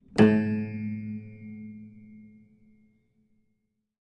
Upright Piano Pizz A HarmFST2
A cool sound I made messing with an out-of-tune upright piano. The tuning is approximately "A."
A, Decay, Fast, freq, FX, harm, Harmonic, hz, Keys, Nodes, Piano, Pizz, Pizzicato, Sample, Snickerdoodle, Upright